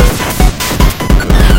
150bpm.PCMCore Chipbreak 6
Breakbeats HardPCM videogames' sounds